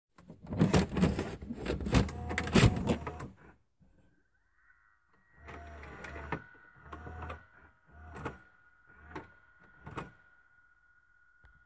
What It Would Sound Like If You Would Put A Security Camera In A FNAF Game, Or If You Would PUt A VHS Into A Tape Recorder.